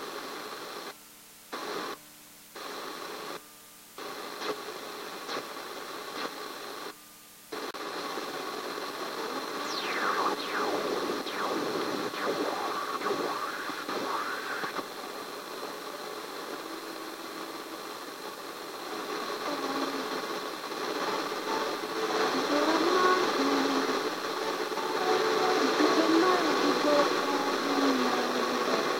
Radio annoyance
annoyance, electricity, error, futz, human, looping, medium, noise, radio, seamless, static, tempo, tune, tunning, voice